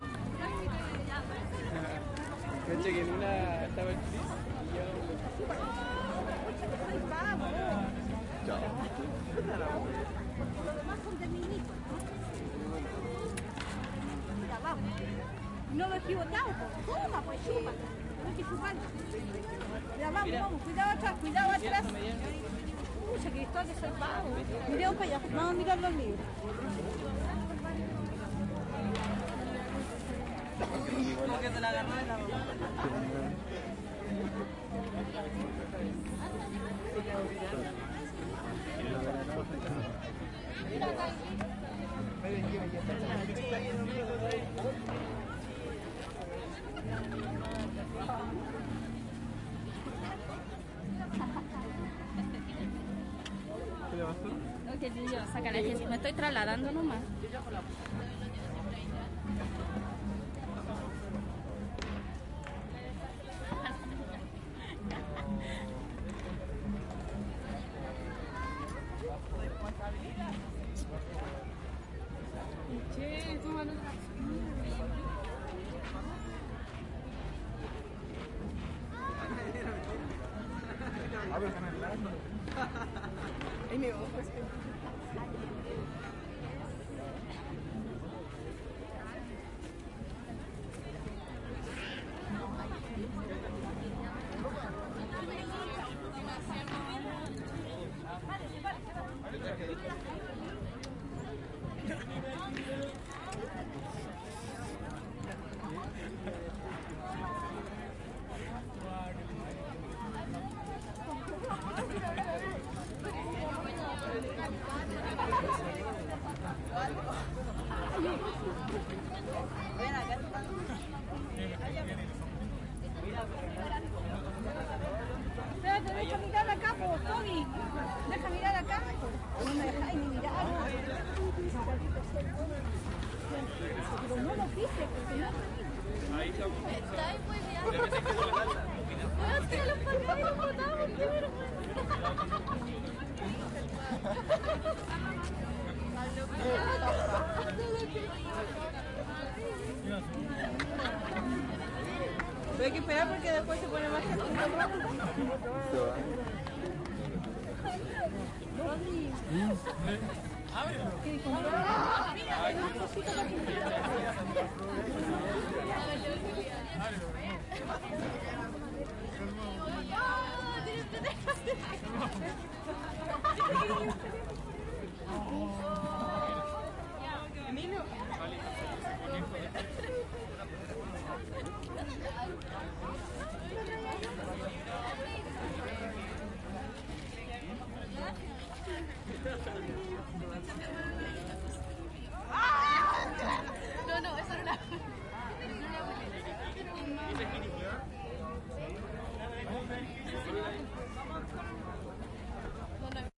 gratiferia 05 - quinta normal
Gratiferia en la Quinta Normal, Santiago de Chile. Feria libre, sin dinero ni trueque de por medio. 23 de julio 2011.
chile, gratiferia, market, normal, quinta, santiago, trade